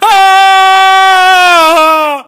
man's scream
Scream of a man recorded with an Iphone 5 at University Pompeu Fabra.
shout, human, scary, 666moviescreams, male, man, speech, voice, scream, vocal